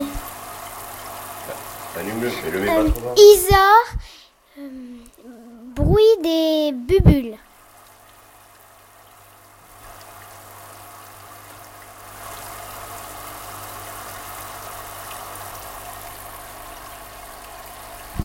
TCR sonicsnaps MFR isaure-romane bulles
Field recordings from La Roche des Grées school (Messac) and its surroundings, made by the students of CM1 grade at home.